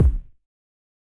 this series is done through layering and processing many samples of drum sounds i synthesised using various plugins namely xoxo's vst's and zynaddsubfx mixed with some old hardware samples i made a long time ago. there are 4 packs of the same series : PERC SNARE KICK and HATS all using the same process.
bass, bassd, bass-drum, bassdrum, bd, deep, drum, floor, hard, kick, kickdrum, kicks, layered, low, processed, synthetic